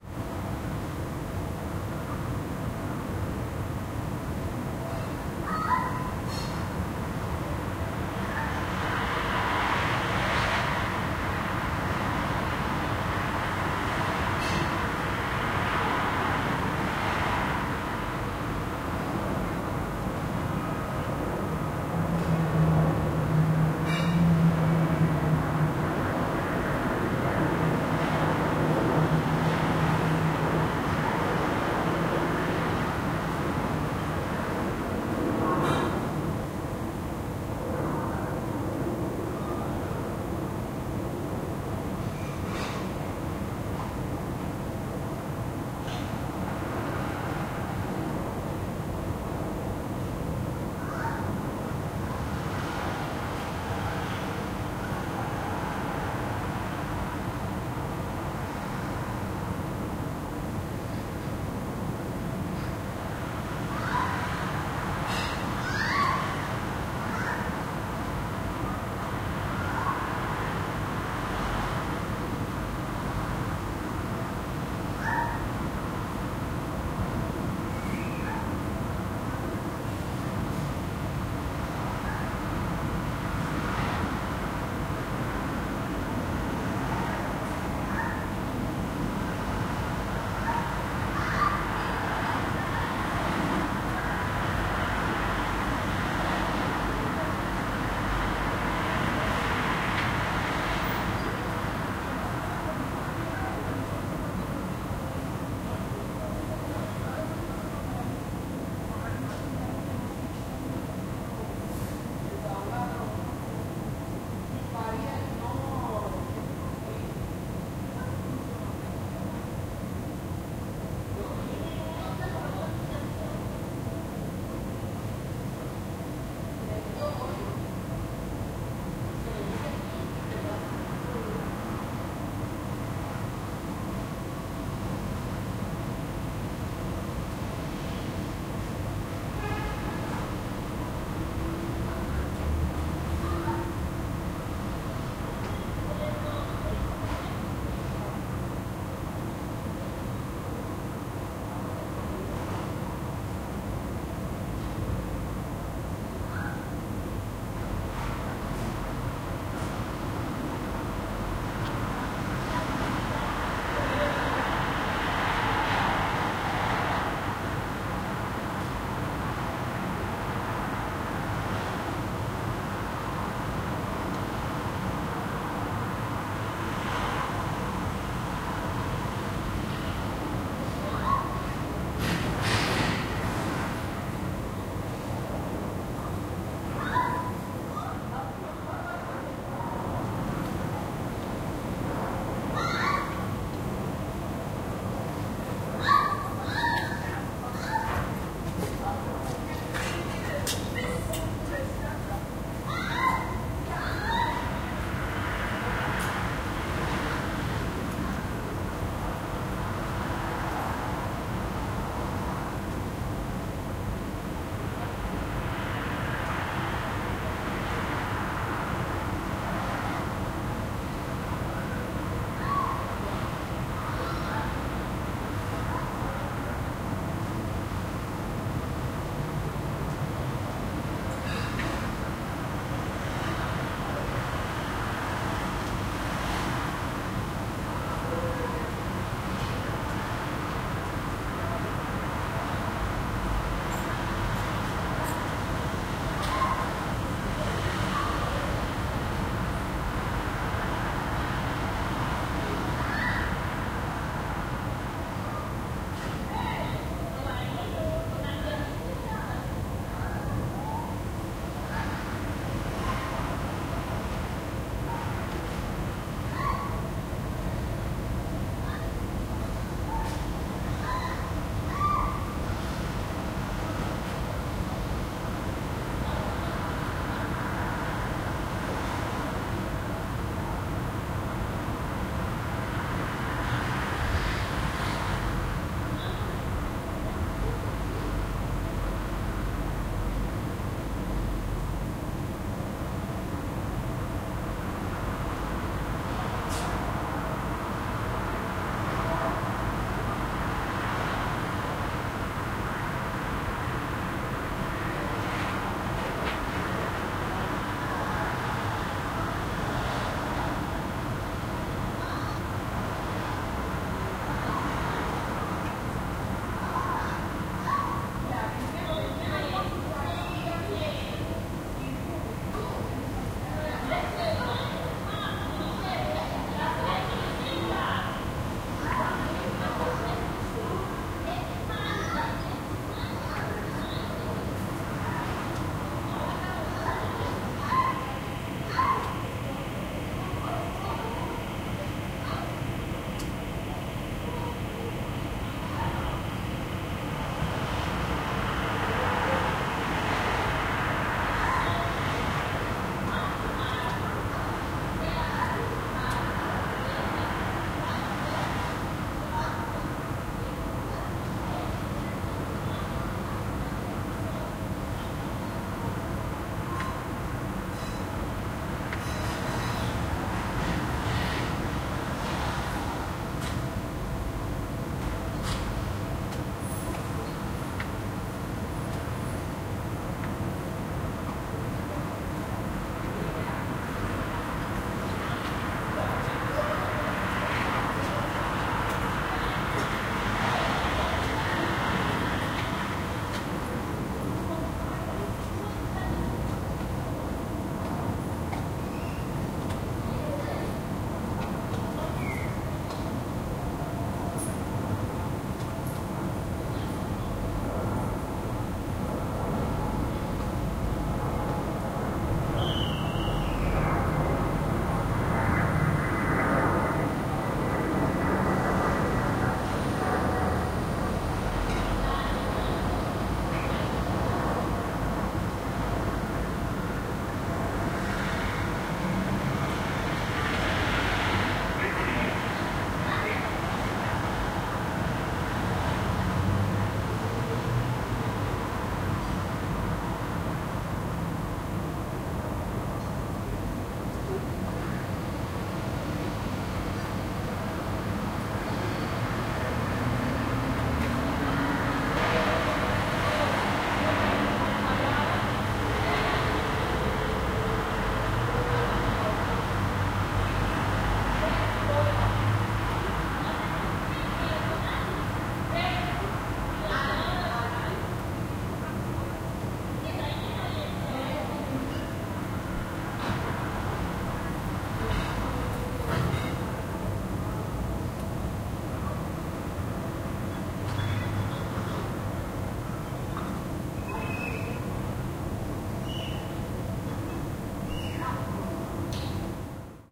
Atmo - Suburbia #18 (overdone)
This one is overdone by experimenting with too many VST Filters... ^-(
..urban ambience with local residents talking, some moderate, yet constant traffic, a plane at the end and featuring the sound of love.
Includes people talking in the background, some distant cars, a church bell, one or two planes about to land, people opening and closing the back door. As special appearance, there is someone having a joyful time (or -less probable- screaming of pain repeatedly) throughout the whole lenght of the recording.
Recorded in stereo on March, 11th 2018 at 09:00 PM.
moderate, evening, backyard, ambience, background, court, field-recording, urban, voices, city, traffic, ambiance, suburbian, distant, atmosphere, neighbourhood, soundscape